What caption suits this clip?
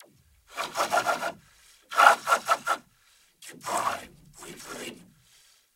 awesome evil laugh
evil, nakina, awesome, laugh, demon